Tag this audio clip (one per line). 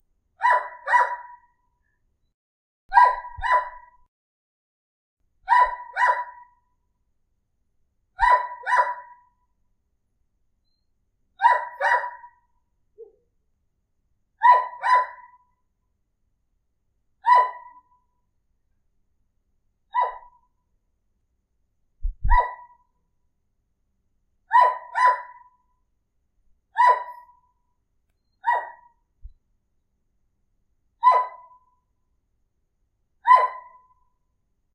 dog
animal
cute
bark